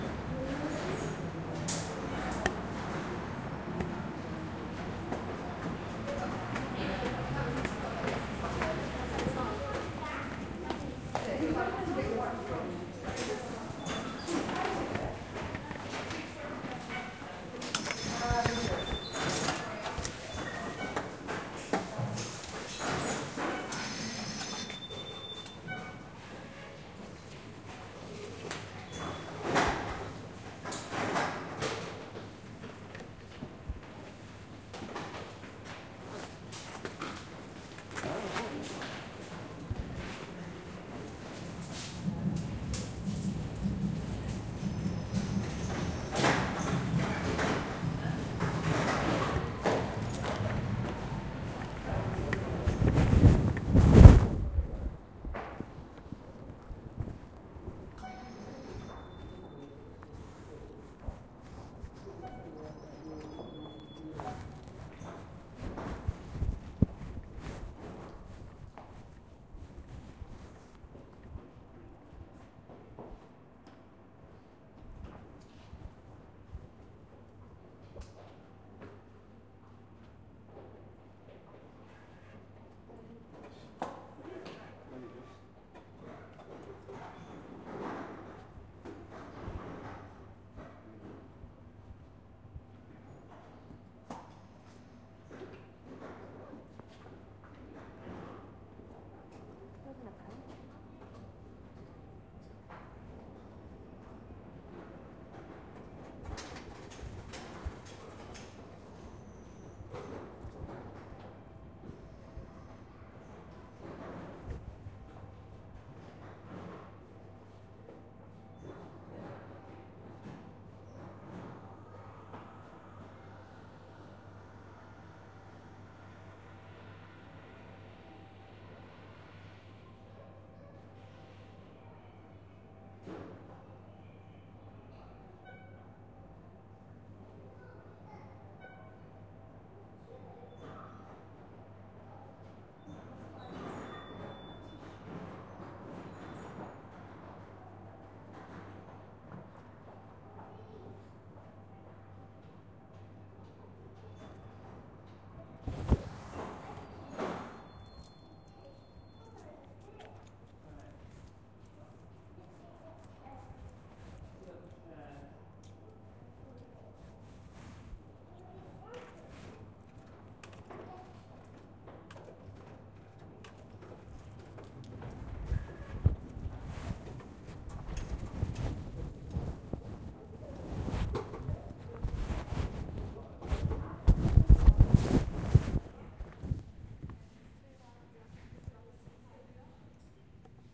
it's the subway in london